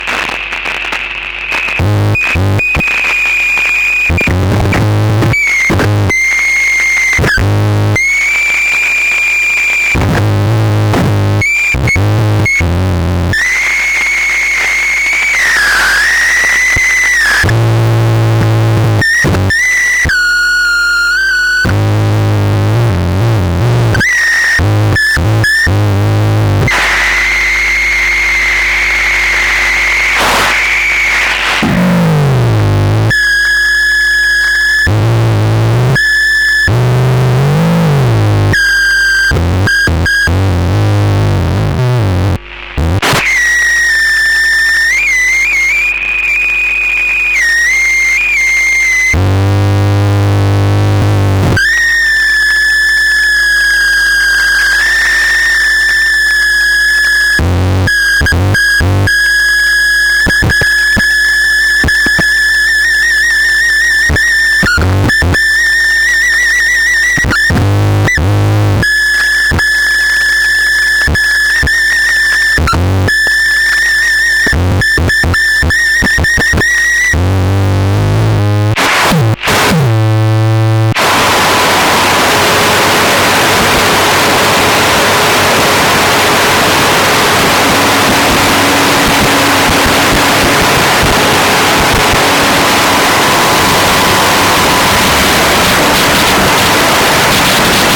radio circuit bending 2
Noisy sounds recorded from a circuit-bent radio transistor
ciruit-bending, lo-fi, radio